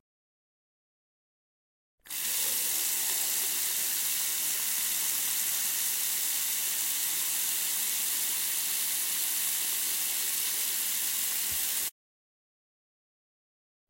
19.1 - water tap
bathroom, CZ, Czech, Panska, running, tap, water